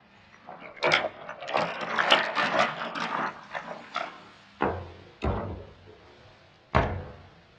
A compilation of soft bangs that have been elongated and the pitch has been reduced to -10. Recorded using a Mac computer microphone.